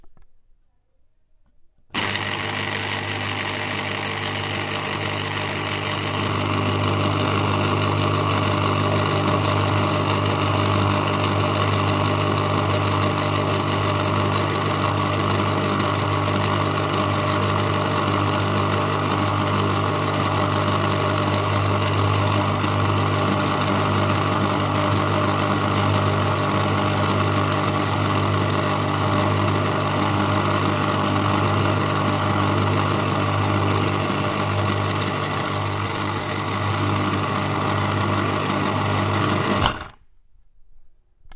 House electric
electric, house